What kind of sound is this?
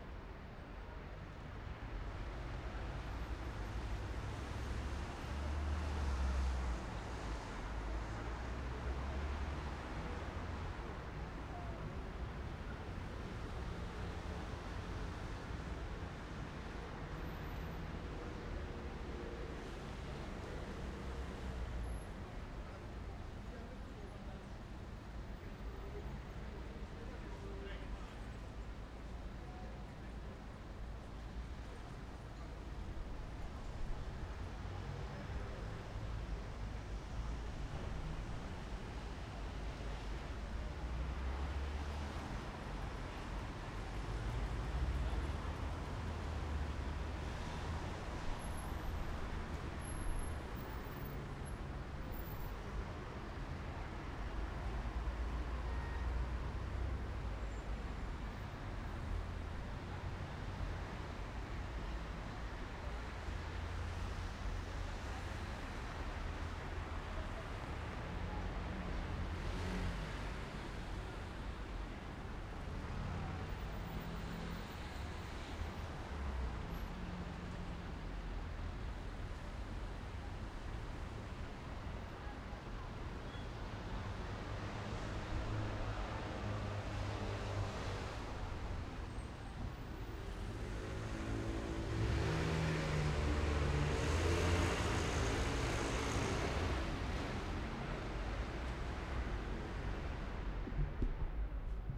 Road Rome Via casilina
cars, Italy, Road, Roma, Rome, street